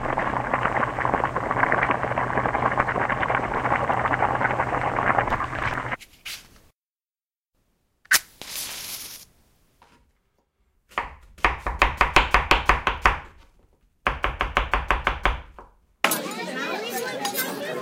Uploaded 4 sounds for a blog post.
I only put them together in audacity for a blog post for a class. Under fair use of being a student.